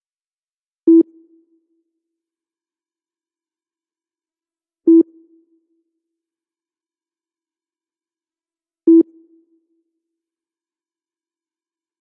Dopey Beeps
Dull computer beeps. Recorded and edited with logic synth plug ins.
beeps, computer, Dull